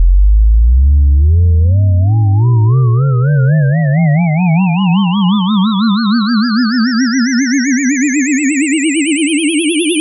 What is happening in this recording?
Generated with Cool Edit 96. Sounds like a UFO taking off.